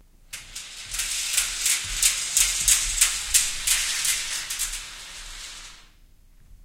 fast pull 2
a second fast, loud, quick pull of the scrim across the stage.
This is a recording of a person running across the stage pulling a scrim that was hung on a track so we could divide the stage. The sound was so distinctive that I decided to record it in case i wanted to use it for transitions and blackouts.
This is part of a pack of recordings I did for a sound design at LSU in 2005.
loud, scrim, track, theatre, pull, curtain, metal